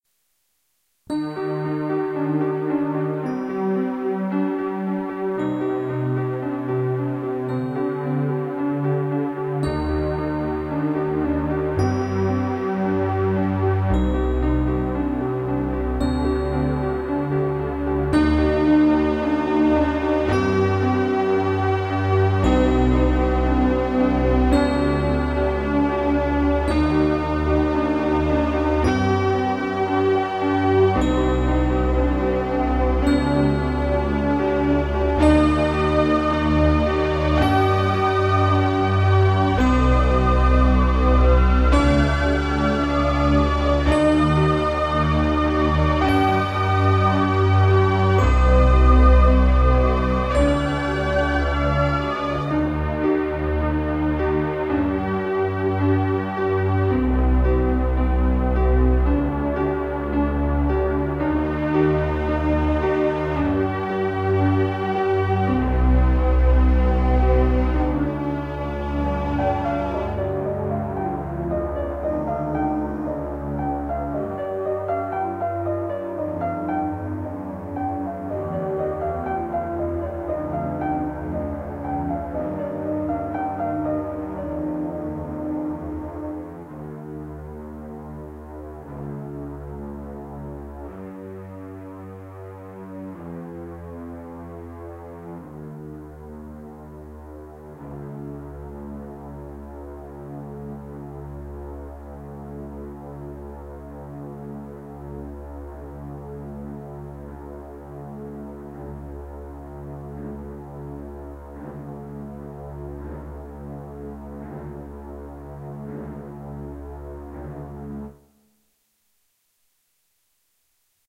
Death of an Octopus - slowed 20 percent
Piano and synthetics, designed by me to be used in your project. This version of the fragment is slowed down 20 percent. This ambient fragment has been one of my favorites and looking forward in sharing it with you guys to see what you can use it for!
*Nicholas The Octopus Camarena*
Nicholas "The Octopus" Camarena
background, fragment, ambient, synthetic, short